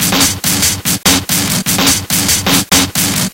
Cyberian Flowerbreak oo7
Several breakbeats I made using sliced samples of Cyberia's breaks. Mostly cut&paste in Audacity, so I'm not sure of the bpm, but I normally ignore that anyways... Processed with overdrive, chebyshev, and various other distortionate effects, and compressed. I'm somewhat new to making drum breaks, I'm used to making loops, so tell me how I'm doing!